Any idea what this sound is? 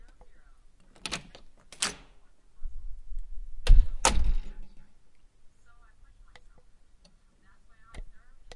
Door open and Close
door, SFX, folley, close